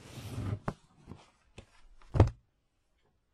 take book2
Pulling a book out and setting it down - a sound effect for an online game I and my nine-year-old brother made:
book, drop, floor, pull, put-down, set, take, thud